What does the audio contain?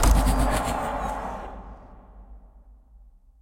Heavily relying on granular synthesis and convolution